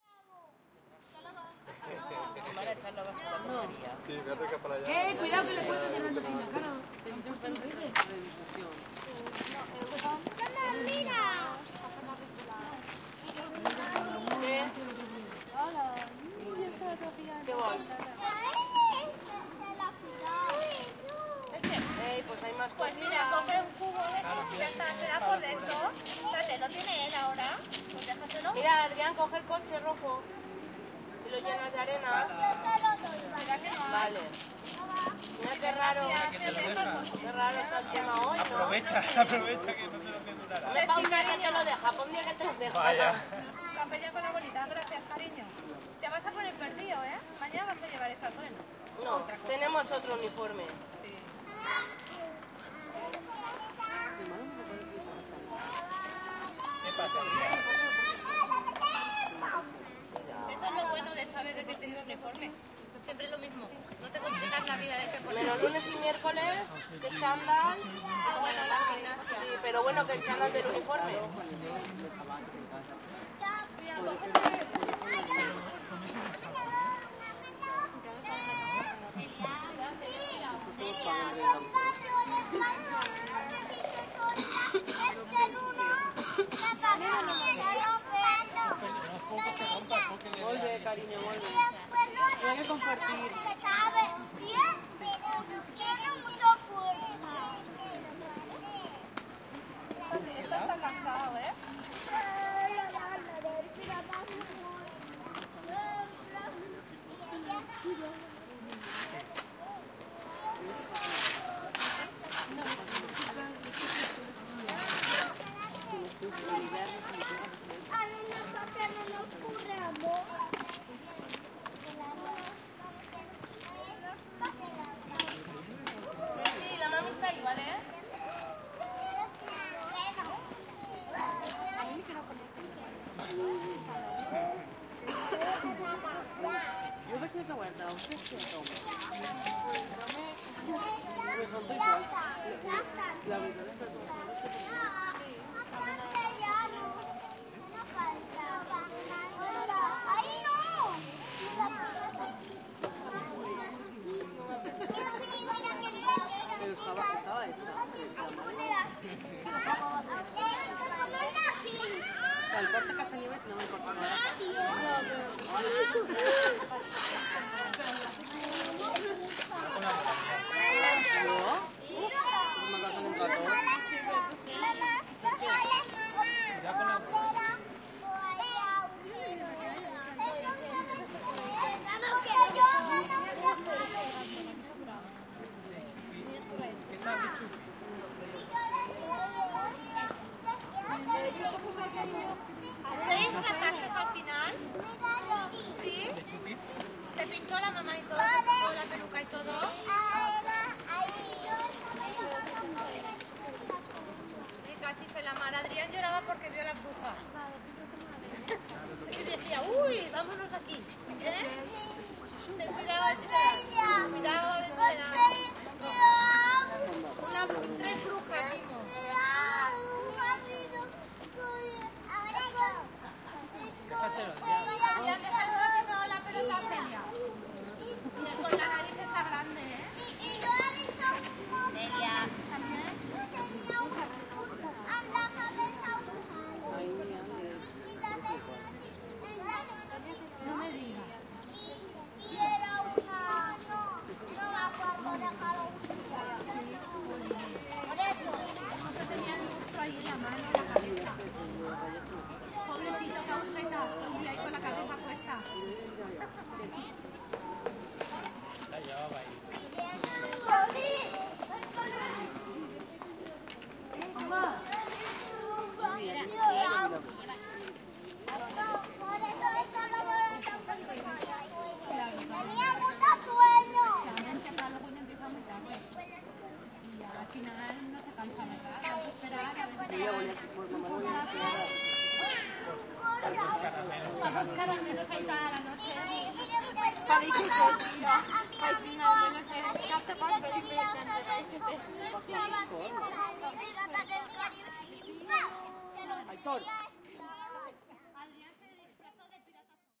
Recording a small playground with children and their parents in an autumn afternoon in Gandia Spain